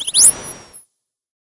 Moon Fauna - 171
Some synthetic animal vocalizations for you. Hop on your pitch bend wheel and make them even stranger. Distort them and freak out your neighbors.
alien, animal, creature, fauna, sci-fi, sfx, sound-effect, synthetic, vocalization